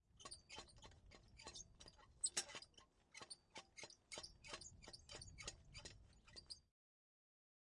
Lantern swinging back and forth
lantern, metal, swing